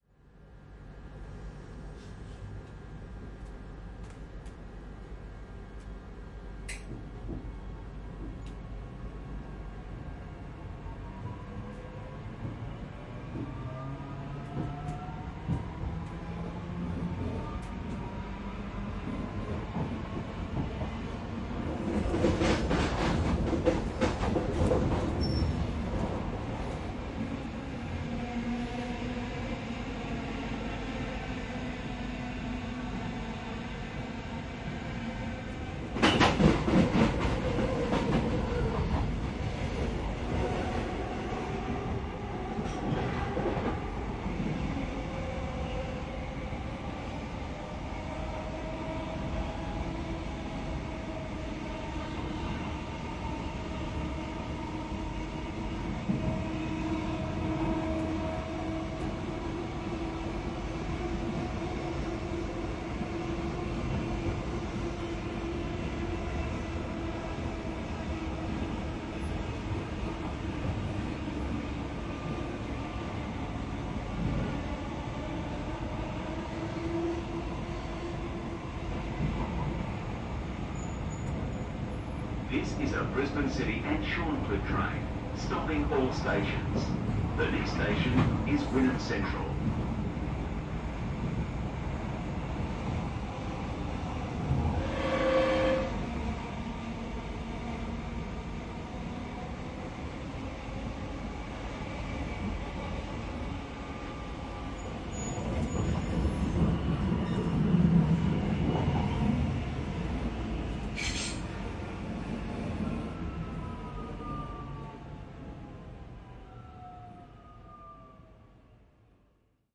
The sound of a trip on a typical EMU train, recorded from the seats, including the automatic announcement of the next station. Recorded using the Zoom H6 XY Module.